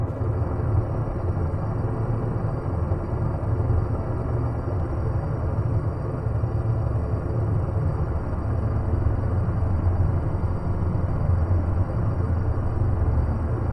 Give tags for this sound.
drone
glittering

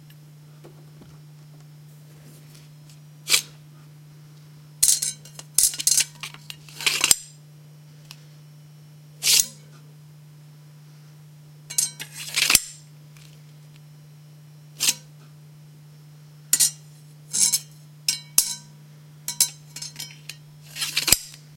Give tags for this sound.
sword
unprocessed
weapon
dagger
unsheath
scrape
sheath
knife
metal

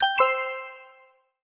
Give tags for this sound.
sound
caution
cute
sweet
warning
alarm
alert

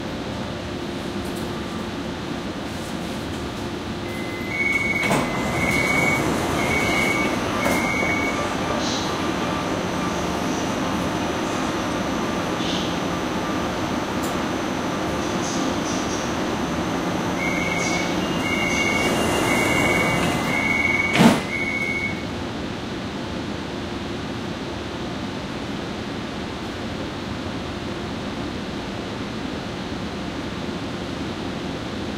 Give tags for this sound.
Train
Kaohsiung
Interior
Busman
Taiwan
DR680
Open
MRT
Close
AT825
Doors

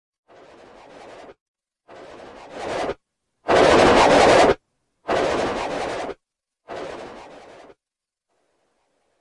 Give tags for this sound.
Atmospheric
Soundscape

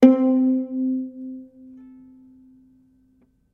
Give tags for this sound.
effects
viola

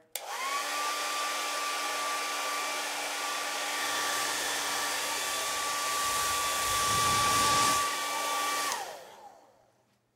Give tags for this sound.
dryer
hair
Hairdryer

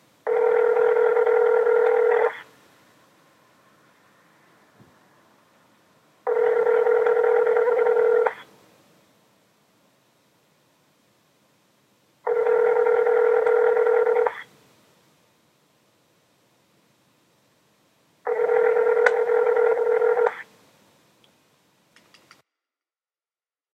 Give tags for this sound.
cellular
effect
iphone
useful

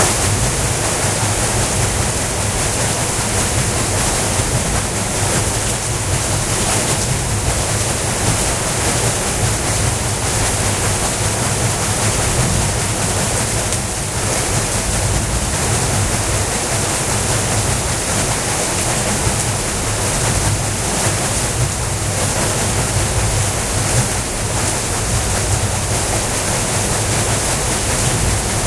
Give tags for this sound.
mill
wheel
water

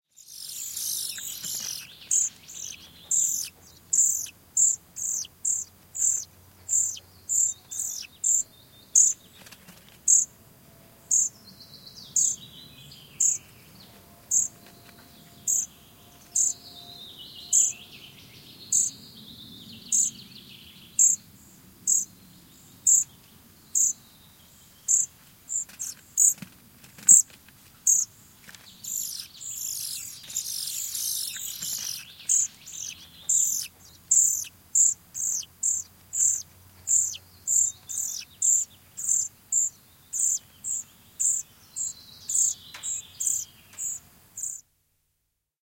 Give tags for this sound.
Bird
Birdhouse
Birds
Chirp
Field-Recording
Finland
Finnish-Broadcasting-Company
Kirjosieppo
Linnunpoikaset
Linnut
Lintu
Luonto
Nature
Nest-box
Nestlings
Pied-flycatcher
Poikaset
Soundfx
Suomi
Tehosteet
Yle
Yleisradio

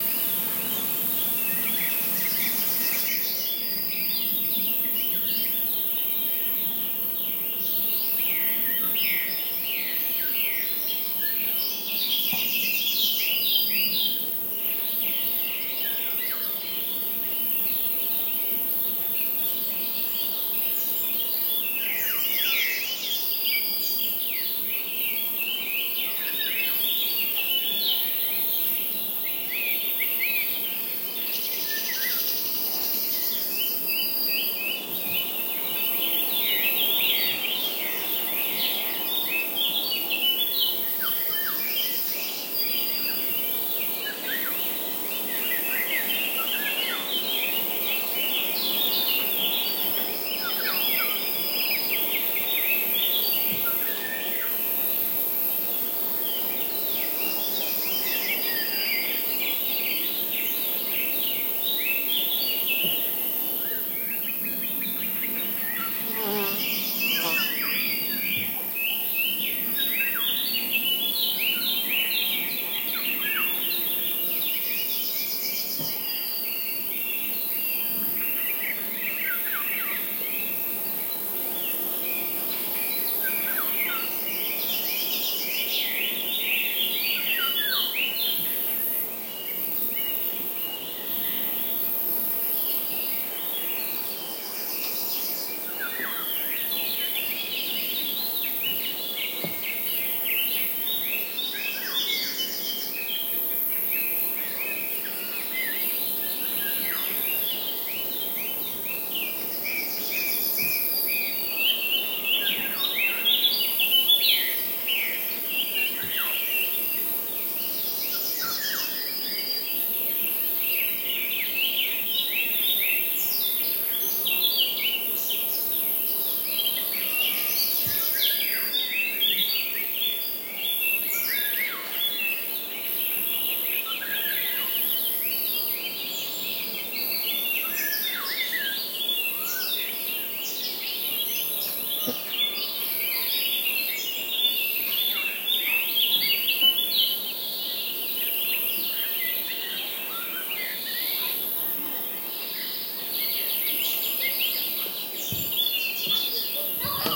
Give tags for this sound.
Afternoon
Birds
Crickets
Field-recording
Hungary
Meadow
Nature

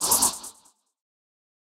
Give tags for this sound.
vicces
fx
audio
sound
effext
pc
sfx
beat
jungle
game

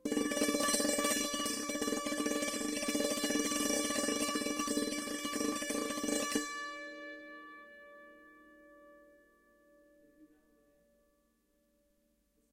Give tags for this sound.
acoustic
roll
santoor

